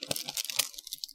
crackling some plastic. there might be some background noise.